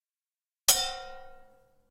#5 Metal Bang
Bang, Bonk, Hit, Impact, Metal, Metallic, Thump